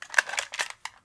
Pulling the clip out of AK sporter rifle recorded with B1 mic through MIC200 preamp. Mastered in cool edit 96.